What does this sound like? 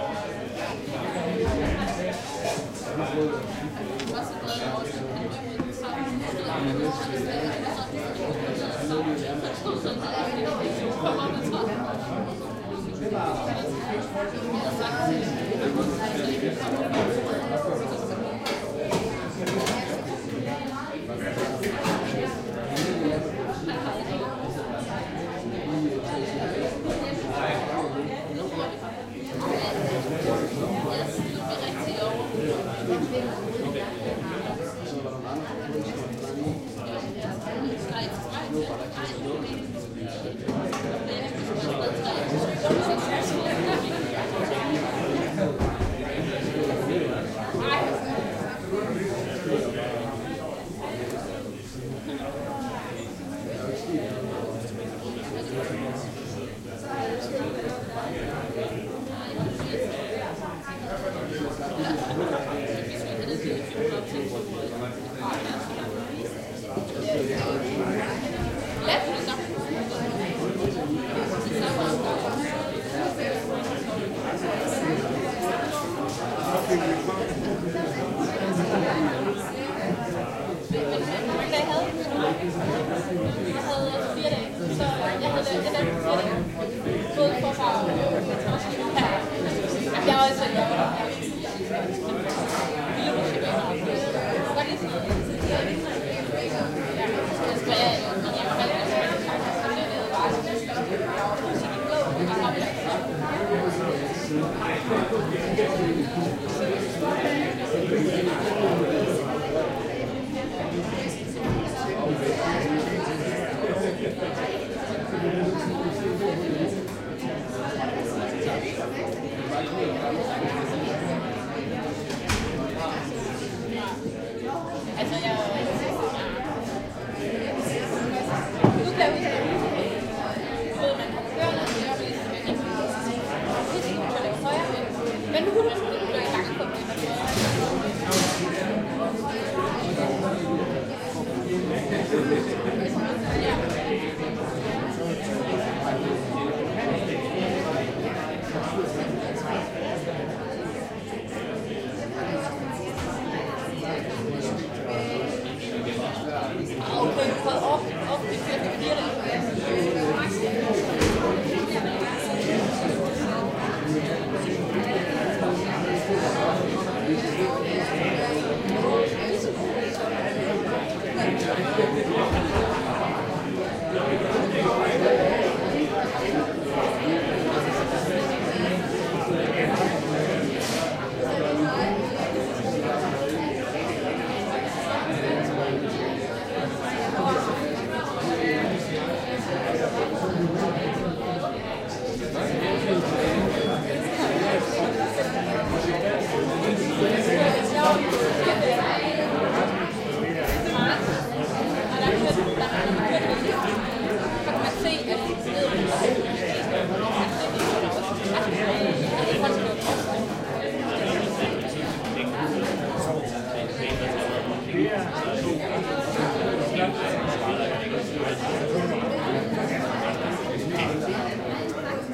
danish crowd
Plenty of people talking in danish with no other major noises. Build-in microphones ZOOM H2 recorder.
chat, crowd, danish, danske, field-recording, people, restaurant, talk